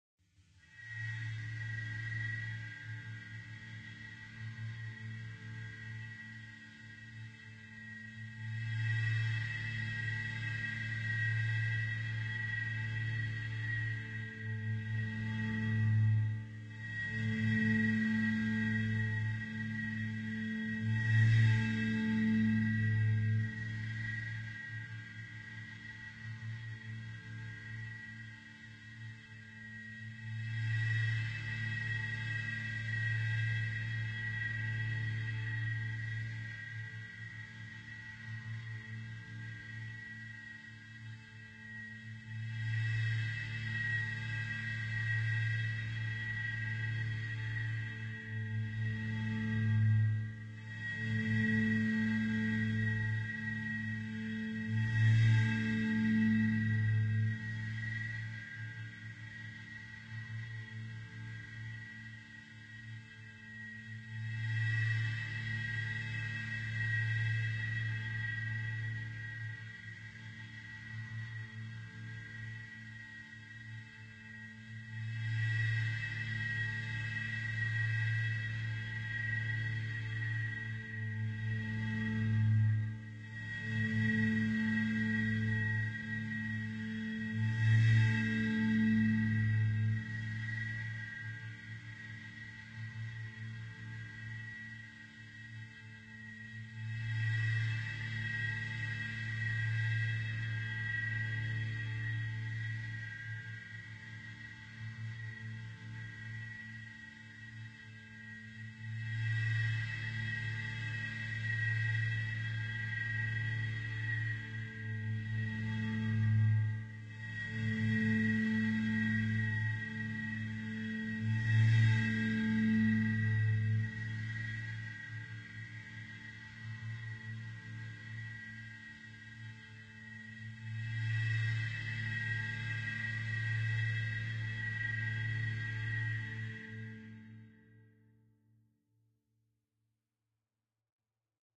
PsicoSphere NINA 1
it is a psicosphere sound designed by me, made by processing an audio recorded from blowing in a glass bottle. I think it came out an interesting result, specially if you want to create a dramatic atmosphere. Enjoy!
FX, abstract, effect, psico, sound-design, soundFX, sounddesign, soundeffect, texture